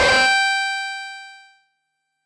DRM syncussion german analog drum machine filtered thru metasonix modular filter.